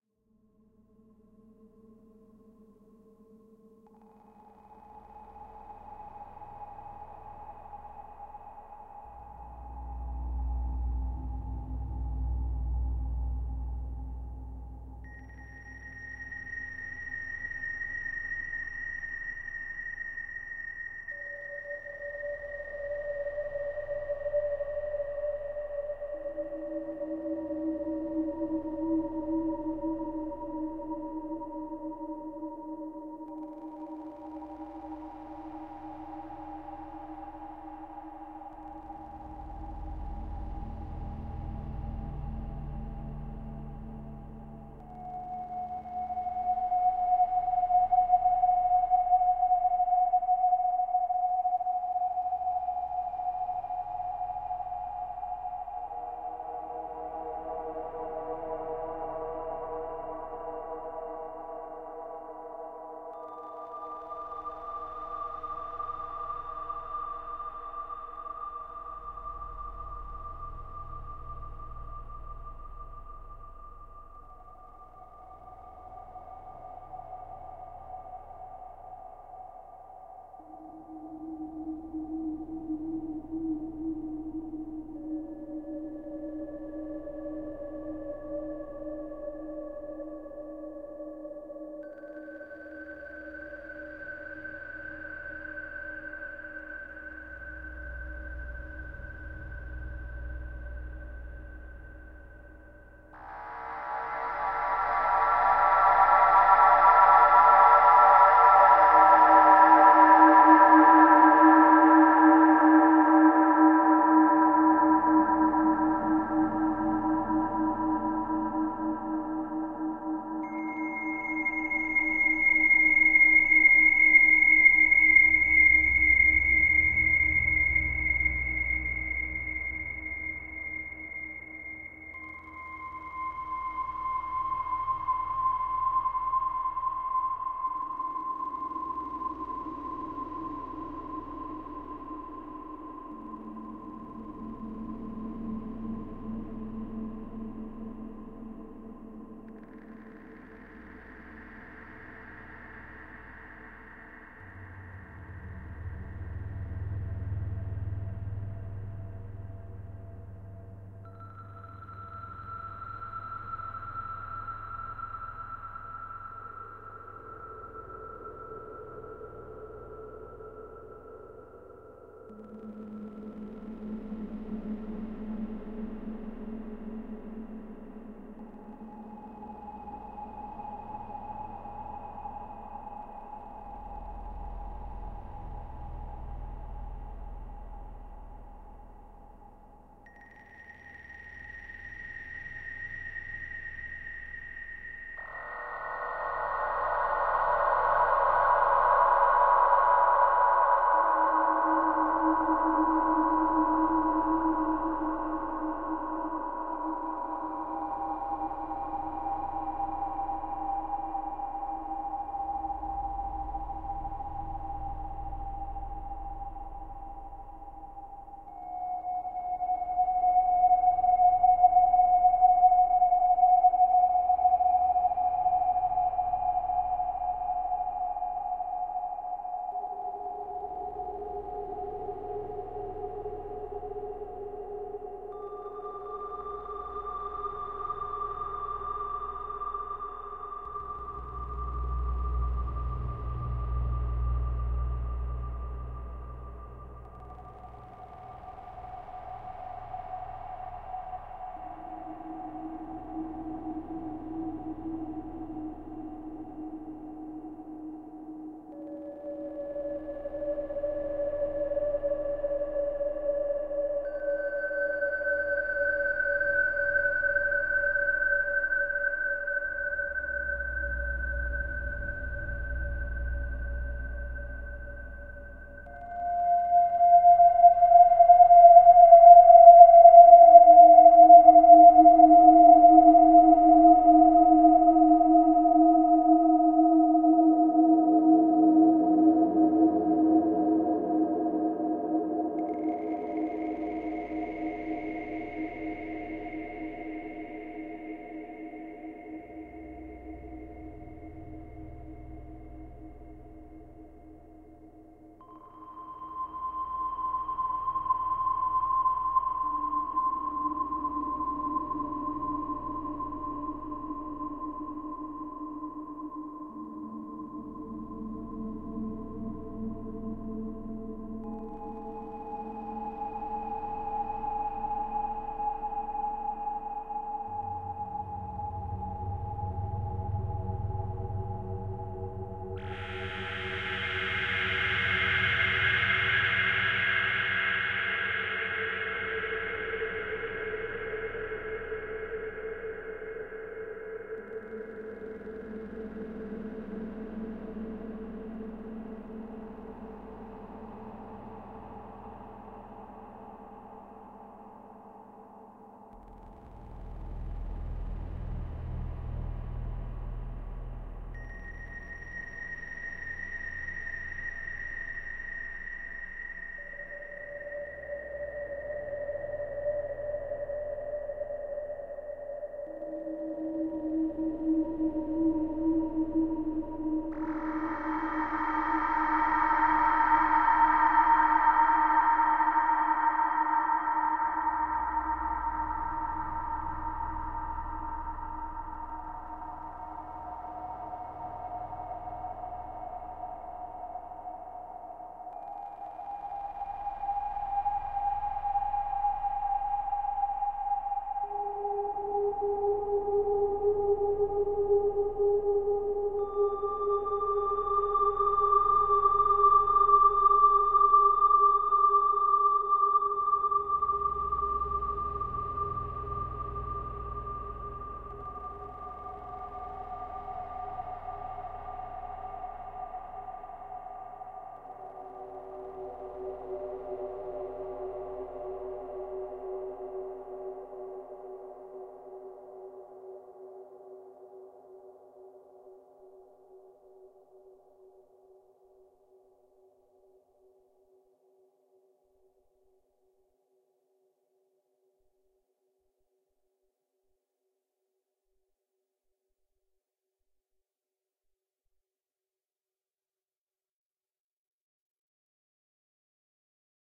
SciFi Ambience 14062016
Created with a Doepfer A-100 modular synthesizer sequenced by a Korg SQ-1 processed by a Zoom Multistomp MS-70CDR guitar multi-fx pedal, using the Particle and HD Reverb.
Audio source is a self-resonating, modulated Doepfer A-108 VCF8.
The reverbs ran in series.
The manual states that the Particle Reverb is based on the LINE6 M9 Particle Verb.
It's always nice to hear what projects you use these sounds for.
Please also check out my pond5 and Unity Asset Store profiles for more: